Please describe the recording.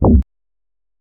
Future Garage (BASS) 06
Future Garage | Bass
Bass, Tech, Garage